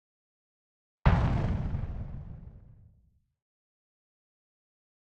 Synthesized Explosion 09
Synthesized using a Korg microKorg
bomb, dynamite, explode, explosion, explosive, grenade, synthesis